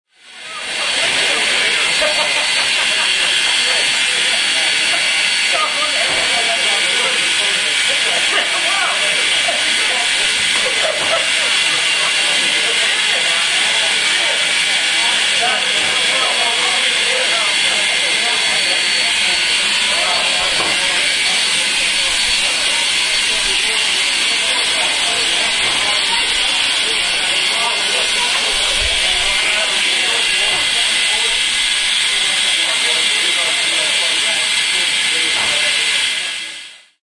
Ja Loco 06
New Zealand Ja Class Locomotive coming into, stationed at and leaving Hamilton Station. Homeward bound to Auckland (Glennbrook) after a joint day trip to National Park and back. Recorded in very cold conditions with a sony dictaphone, near 10pm NZST.
steam-train, new-zealand, locomotive